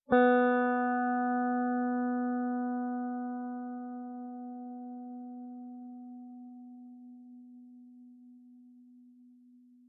Recording of an open B-string of a Fender Stratocaster. Processed to remove noise.
b-string, guitar, fender-stratocaster, clean, electric-guitar